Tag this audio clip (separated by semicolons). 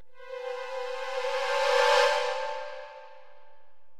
horror; drone; creepy; stinger; strings